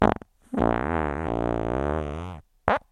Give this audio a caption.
The talking fart as seen on TV recorded with a with a Samson USB microphone.